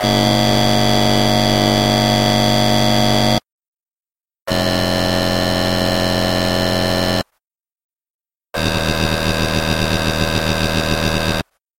Subosc+Saro 027
Harsh processed monotron sounds. Only the lower notes were usable. Higher notes were unstable and wobbled too much in a nasty way.
The headphones output from the monotron was fed into the mic input on my laptop soundcard. The sound was frequency split with the lower frequencies triggering a Tracker (free VST effect from mda @ smartelectronix, tuned as a suboscillator).
I think for this one also the higher frequencies were fed to Saro (a free VST amp sim by antti @ smartelectronix).
antti, bleep, harsh, overdrive, smartelectronix, electronic, noisy, mda, korg, tracker, beep, distortion, monotron-duo, saro